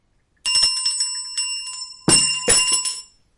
Bell ringing & dropping
Needed a sound effect for my am dram group, of a bell ringing & being dropped so recorded this on my Zoom H2 recorder.
bell, dropped, falling, fashioned, Hand, old, shop